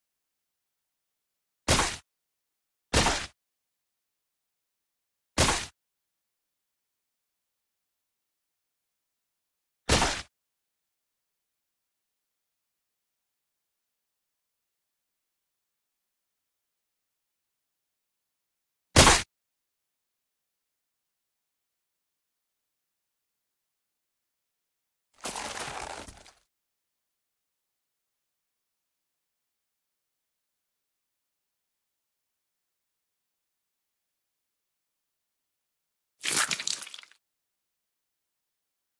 Blood and gore FX performance 1
Blood'n'gore performance from the movie "Dead Season."
dead-season, blood, hit, smash, gore, drip, flesh, squish, splat